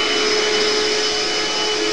vacuum running
The sound of a Royal "DirtDevil" Model 085360 vacuum cleaner running. Relatively high pitched motor sound.
Recorded directly into an AC'97 sound card with a generic microphone.